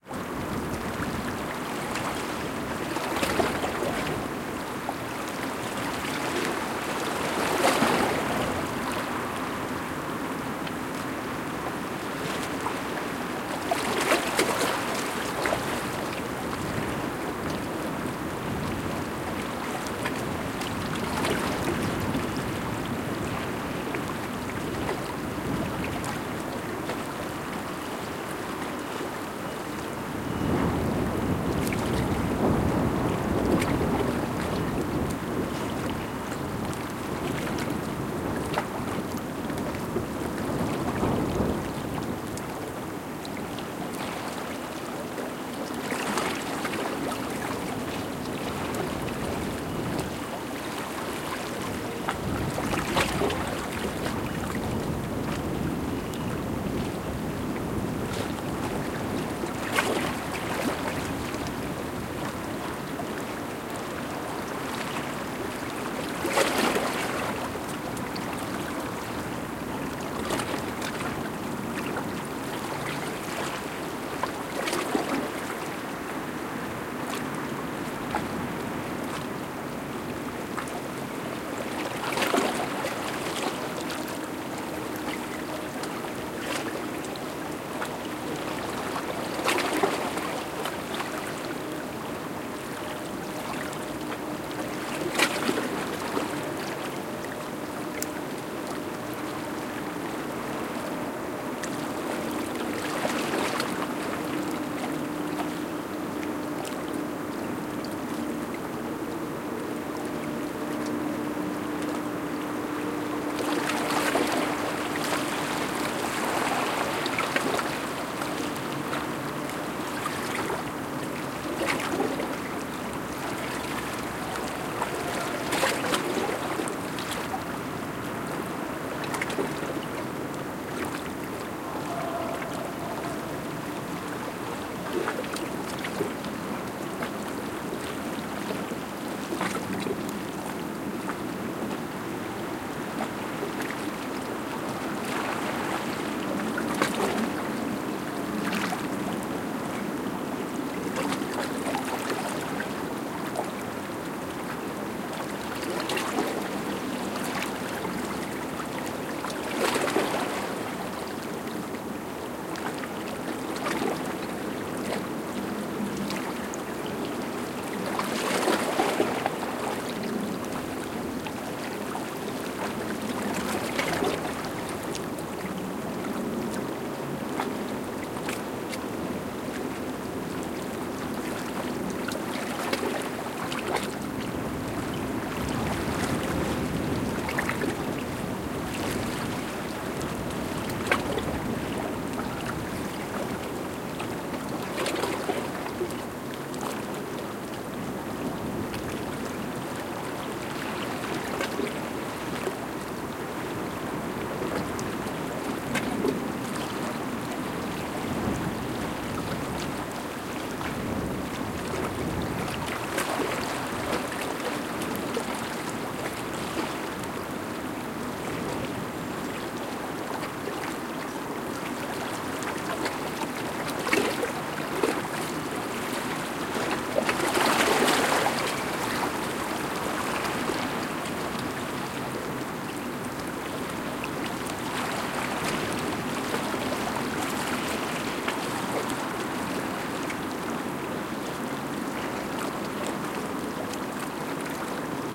Recording of a pier in Vado Ligure (Italy), during a windy night.